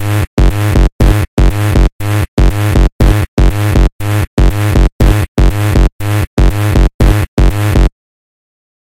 Electro síncopa alta 1
Ritmo métrica binaria de 4 pulsos y 4 compases. El sonido grave marca la síncopa.
Síncopa --> 1
Binary metric rhythm of 4 pulses and 4 compasses. The low sound marks the syncopation.
Syncope --> 1
electro, loop, rhythm, ritmo, sincopa, syncope